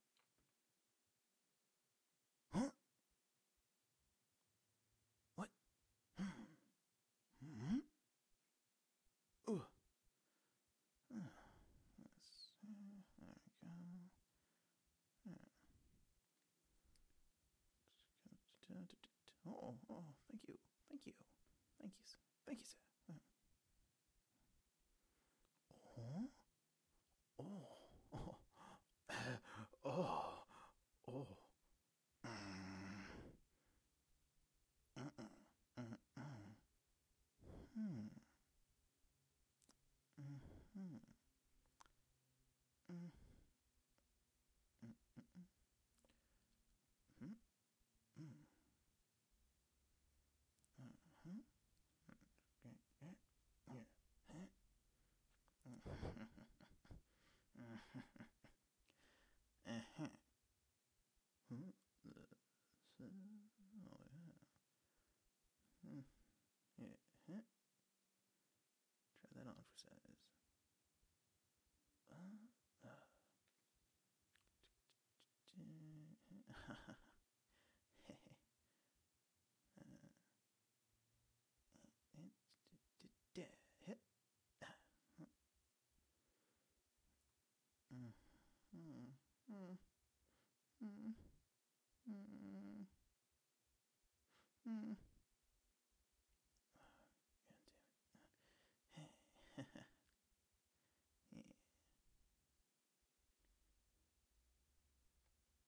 Comical Grunts And Mumbles 2
Comical male grunts and mumbling.
mumble, funny, grunt